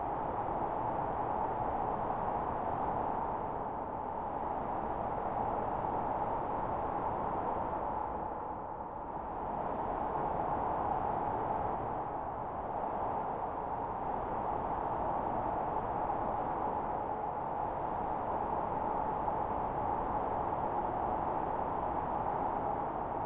Wind 1 Loop
Manufactured Wind Effect. Pink noise with a Low Pass Filter and Reverb Effects